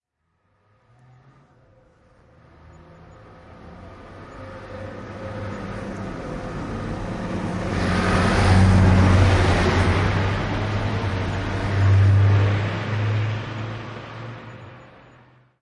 Vehicle passing
Recorded and processed in Audacity